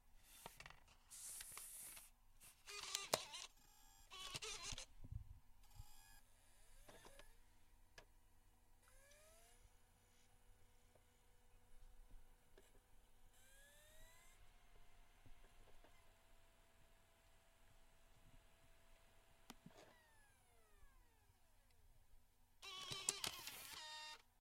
A Mac Book Pro's CD Drive taking in, reading and ejecting a CD.